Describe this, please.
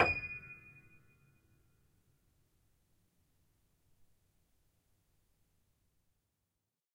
upright choiseul piano multisample recorded using zoom H4n